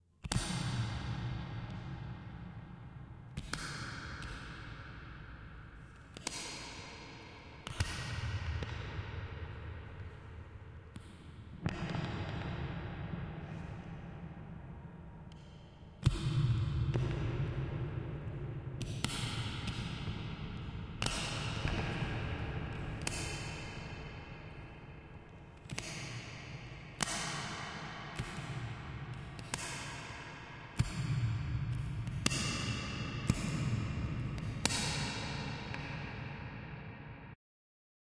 A alien gun in space
OWI, alien, film, futuristic, gun, sound-effects